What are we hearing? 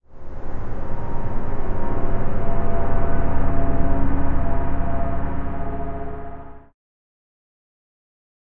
Nightmare Atmosphere
A dark atmosphere made in FL Studio. I basically took a dark atmosphere I made from manipulating a drum and processed it further to become this atmosphere. Add your own reverb :)
atmosphere, dark, deep, drone, haunting, horror, spooky, suspense